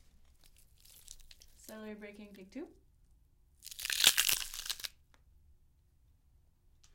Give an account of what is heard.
Celery braking with condenser mic in studio